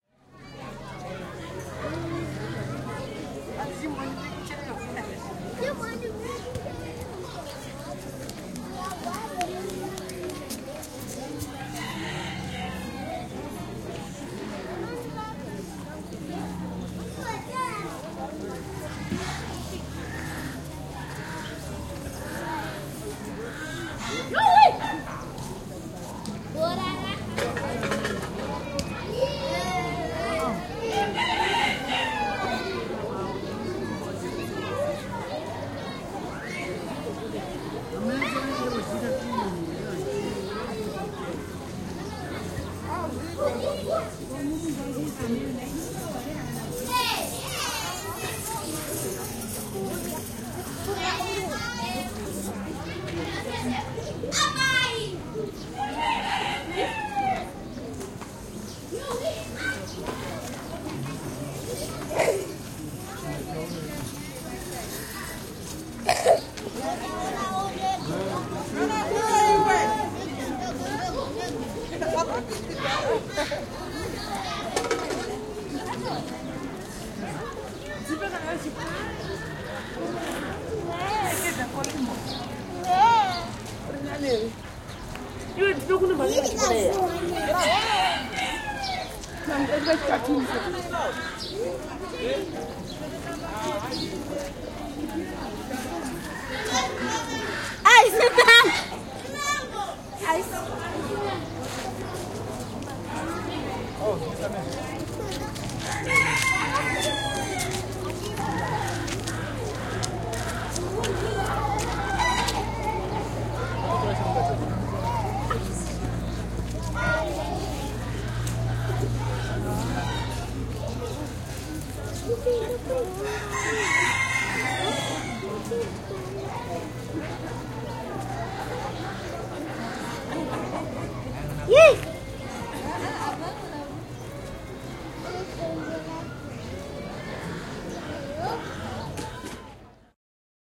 Zimbabwe, kylä / Zimbabwe, lively village, people talking, children playing, a rooster crowing
Vilkas kylä, sorinaa, aikuisia ja lapsia, kukko kiekuu, kanoja, kaukaista liikenteen ääntä.
Paikka/Place: Zimbabwe / / Hararen ympäristö / near Harare
Aika/Date: 01.11.1989